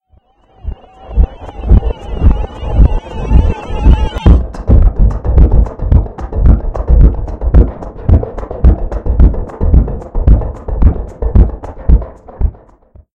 I recorded myself beat boxing and added like 90+ effects so now it sounds weird. It was also used to make "alien song" a sound from the pack.